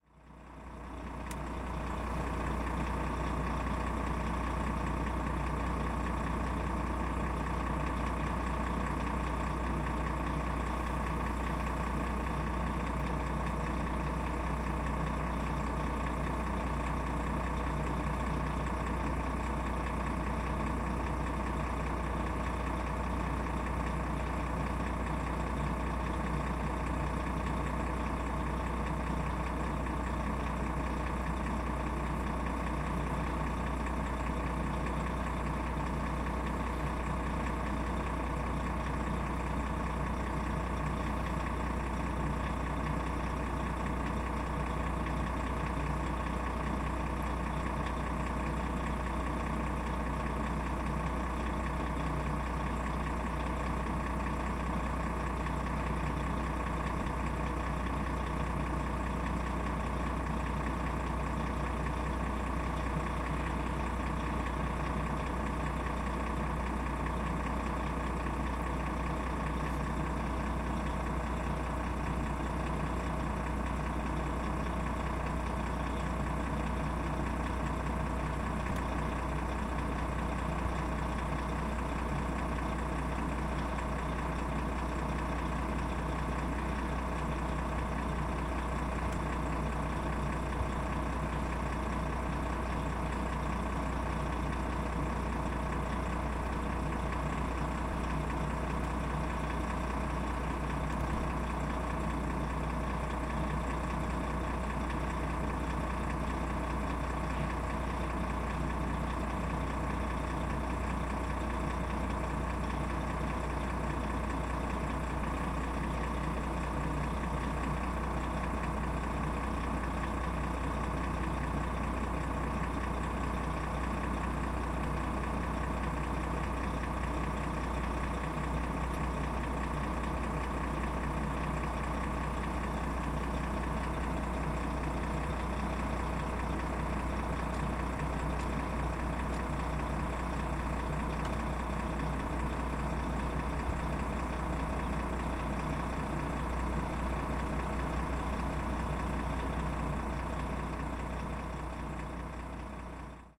110814-rechargning trucks in padborg
14.08.2011: fifteenth day of ethnographic reserach about truck drivers culture. Padborg in Denmark. Truck base (base of the logistic company). Recharging trucks. Whirring engine on low gear.